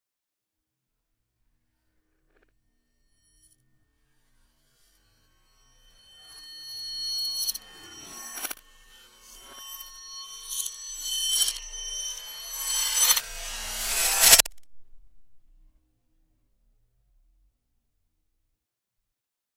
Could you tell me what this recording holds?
glass breaking reversed
medium length sound of glass breaking put into reverse, lots of moments where it starts off low volume and slowly rises to higher then cuts off.
pitch, high, glass, reverse